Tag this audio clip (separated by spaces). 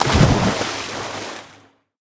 big splash water wet